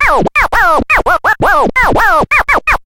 scratch343 looped
Scratching a spoken word. Makes a rhythmic funky groove (loopable via looppoints). Technics SL1210 MkII. Recorded with M-Audio MicroTrack2496.
you can support me by sending me some money:
dj, funky, hiphop, hook, loop, loopable, looped, phrase, record, riff, scratch, turntablism, vinyl, weird